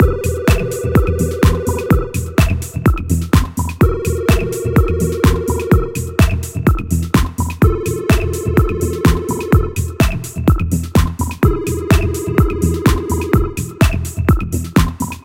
A waiting-time inspired loop with some SID-like sound
loop, SID, waiting